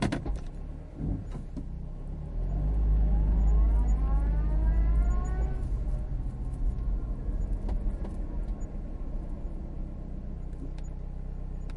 car reverse
Honda CRV, stopped, reversing, stopped. Recorded with a Zoom H2n.